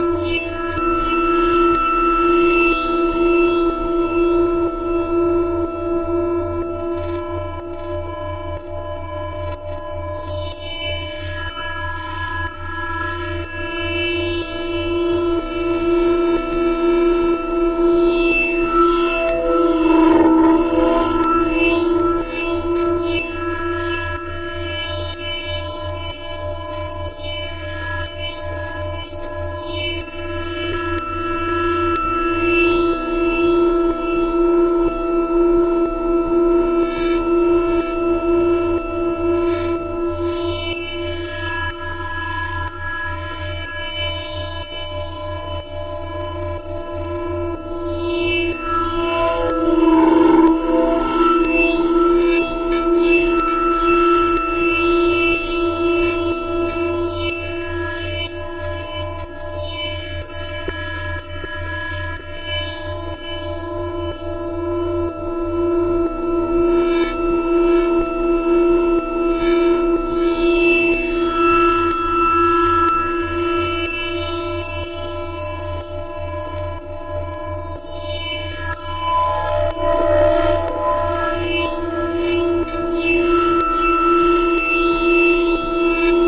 scaryscape digitalgangstha
a collection of sinister, granular synthesized sounds, designed to be used in a cinematic way.